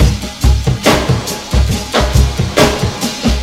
Trip-hop drum loop 140BPM
Trip-hop drum loop
140bpm, drum, loop, trip-hop